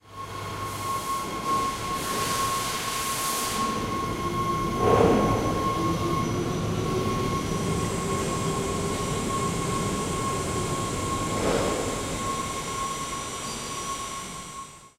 Spectacular heavy industrial crane movement. Recorded with Tascam DR 22WL.
In case you used any of my sounds I will be happy to be informed, although it is not necessary.
Recorded in 2019.